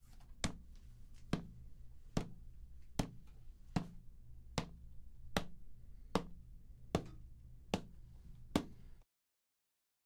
Pisadas en Madera
wood footsteps sound
footstep, step, walk